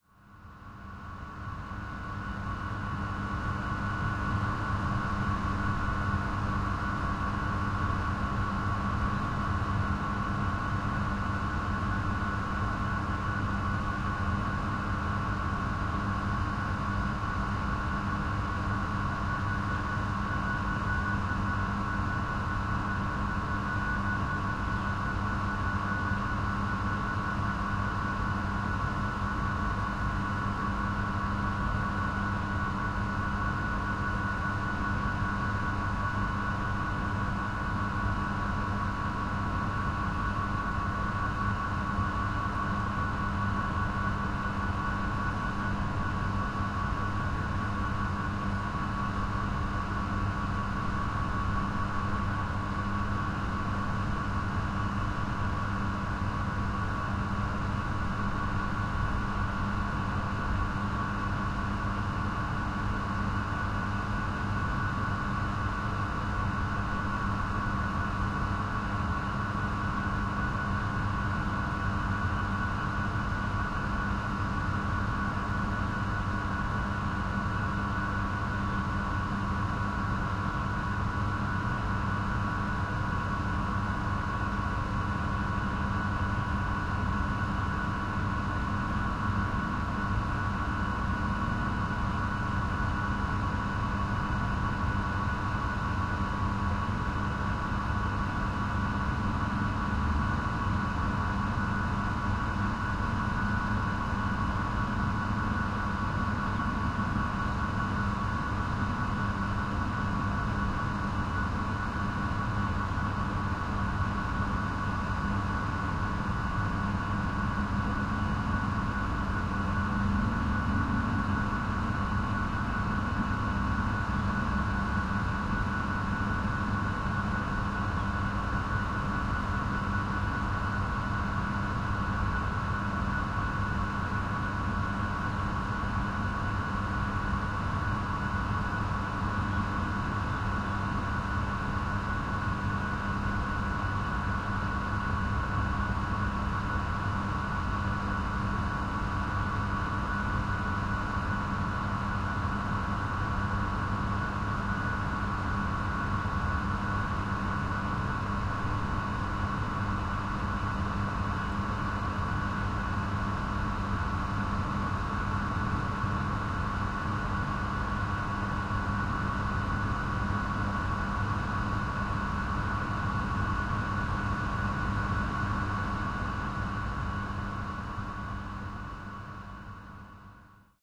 Recorded near an operating water pump in a water reservoir in London using two spaced Sennheiser 8050 and a SoundDevices Mixpre3 Recorder.